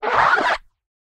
Creature Voice, A1, Dry
Raw audio of scraping a wet polystyrene bodyboard with my hands. Then processed and edited with a pitch shifter in Cubase to sound like a creature. Part of a sound library that creates vocalization sounds using only a bodyboard.
An example of how you might credit is by putting this in the description/credits:
The sound was recorded using a "H1 Zoom recorder" and edited in Cubase on 16th August 2017.
Hidden, vocalization, BB